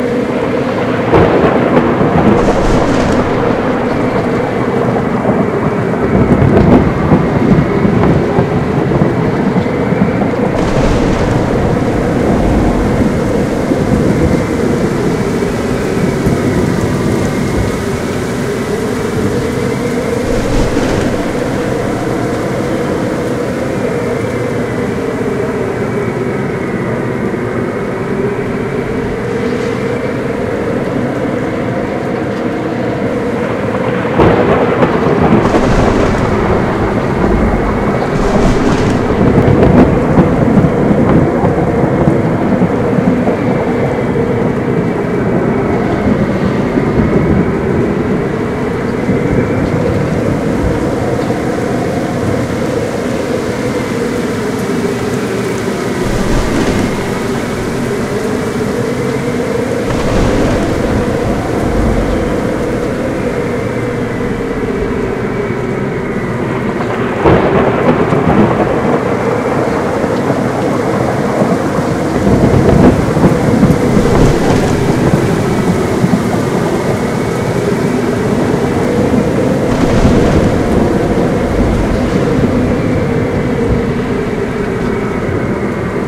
This is a collection of samples to make a really violent storm.
It is based on other samples, so the ultimate license depends on those samples.
rain, storms, thunder, violent